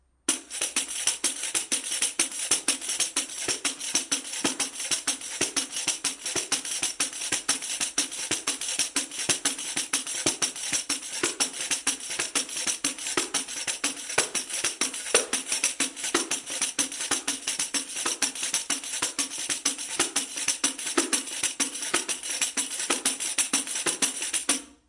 Playing a samba rhythm on different brazilian hand drums, so-called “pandeiros”, in my living room. Marantz PMD 571, Vivanco EM35.